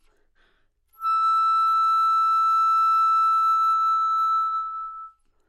Part of the Good-sounds dataset of monophonic instrumental sounds.
instrument::flute
note::E
octave::5
midi note::64
good-sounds-id::138